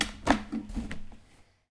kitchen drum percussion jar tap sound hit
drum, hit, jar, kitchen, percussion, sound, tap